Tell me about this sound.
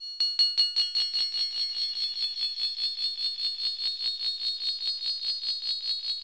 Sounds like metal orbs tied to a string clacking against each other and sounding less and less percussive the less they hit.
Created using Chiptone by clicking the randomize button.